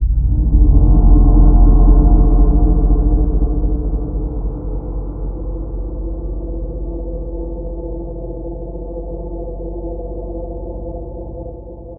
ab mars atmos
a reverbed synth hit sounds like deep space mars
ambient, atmospheres, drone, evolving, experimental, freaky, horror, pad, sound, soundscape